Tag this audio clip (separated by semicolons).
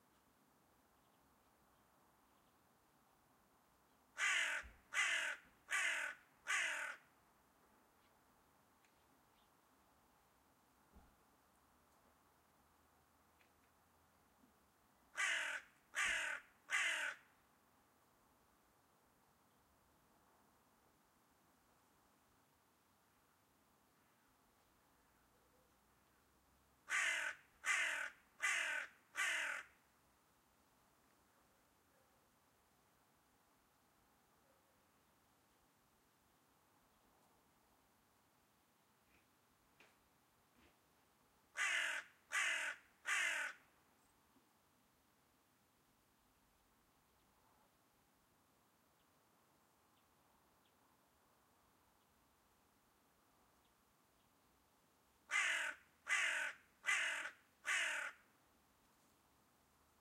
ambience; audio; bird; birds; birdsong; CM3; crow; fethead; field-recording; garden; h4n; h4n-pro; hooded; hooded-crow; line; line-audio; nature; ortf; stereo; zoom